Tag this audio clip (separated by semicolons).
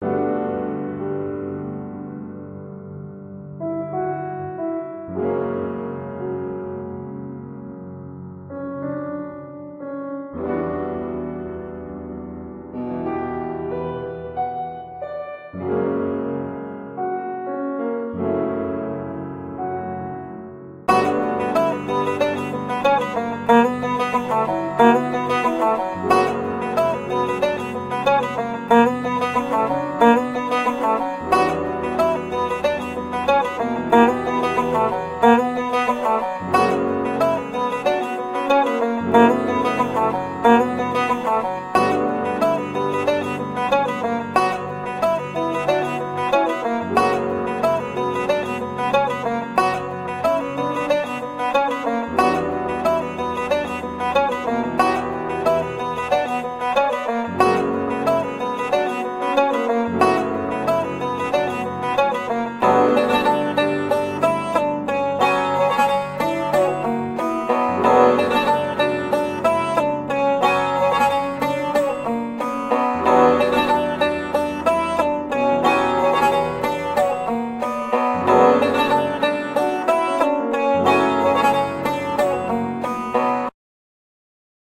Free; Piano; Music; Loop; Guitar; Beat; Sound